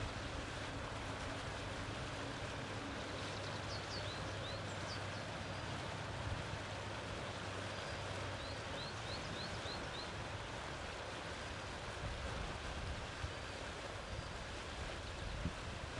Forest, Birds, Creek
Birds tweeting while water from creek is flowing in a forest during the day.
Water, Peaceful, Flowing-Water, Field-recording, Birds, Day, Park, Creek, Forest, Canada, Ontario, Environment, Nature, Soundscape